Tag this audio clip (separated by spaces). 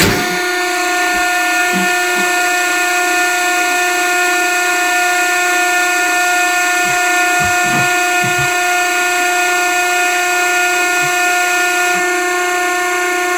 Compressor Dumpster Machinery Mechanical Science Sci-Fi